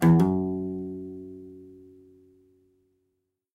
F#2 Guitar Acoustic Hammer-on/Mediator
F#2 played on the lowest string (E) of an acoustic guitar with an hammer-on technique on the left hand and with a mediator for the right hand.
Recorded with a Zoom h2n
guitar, oneshot, single-notes, acoustic, hammer-on, nylon-guitar, asp-course, mediator